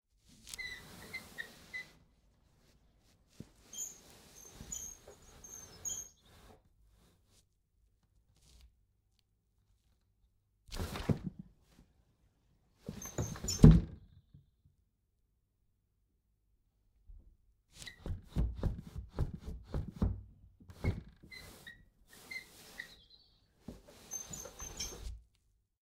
Sash Window Open and Close
Sash window, mulitple opens and closes, some rattling of the frame and squeaking of the wood.
Window, House